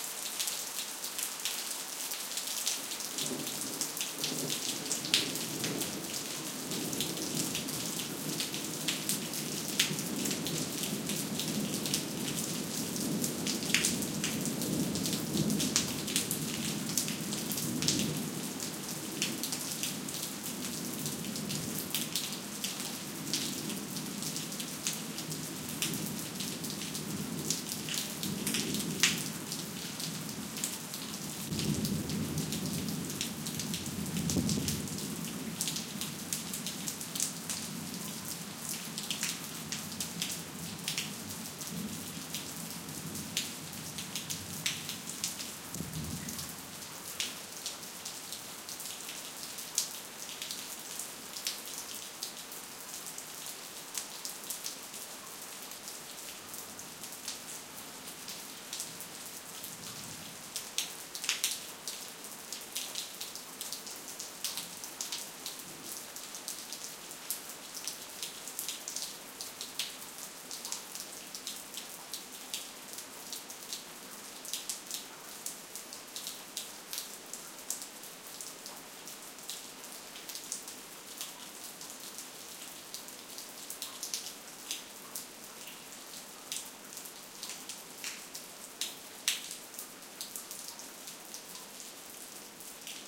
20151101 soft.rain.thunder.07
Raindrops falling on pavement + thunder. Primo EM172 capsules inside widscreens, FEL Microphone Amplifier BMA2, PCM-M10 recorder. Recorded at Sanlucar de Barrameda (Andalucia, S Spain)